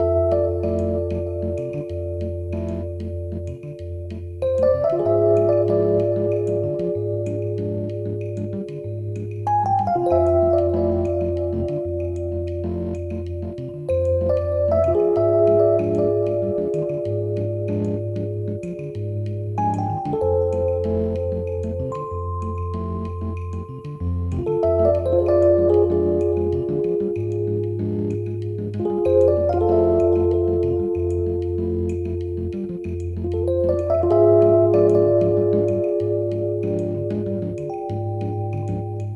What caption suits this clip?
Island tune - short loop
Short loop tune.
background, music, rhythmic, stinger